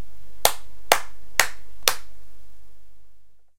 clatter of hand
clatter, hand